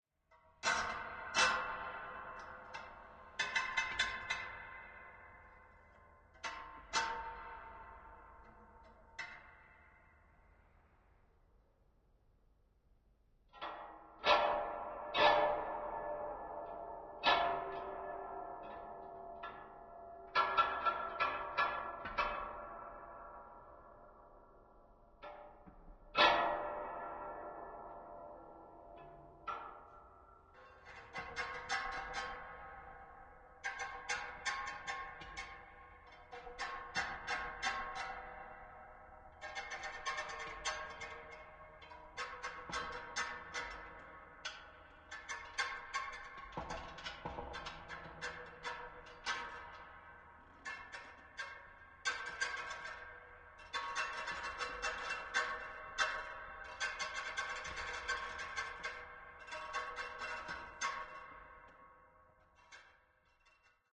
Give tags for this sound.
Ambient
Atmosphere
Metal
Creepy
Sound-Design
Scary
Eerie
Strange
Spooky
Horror
Foley

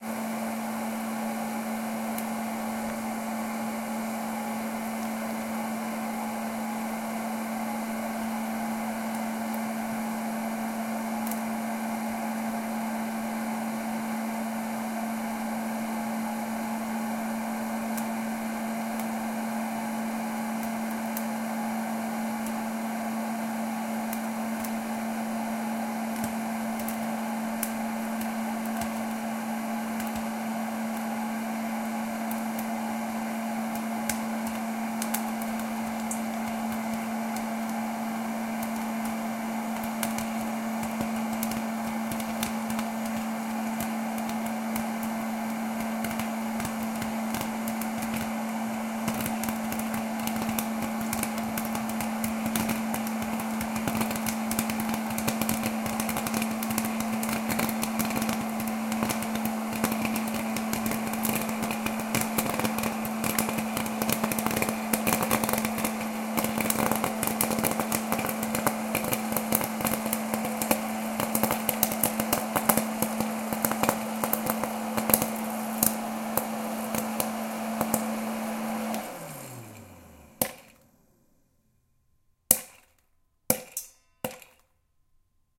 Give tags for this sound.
cook
cornelius
heat
pop-corn
fan
whiz
crackle
food
pop
buzz
popping

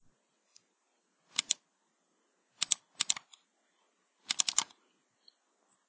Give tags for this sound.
click
mouse
sound

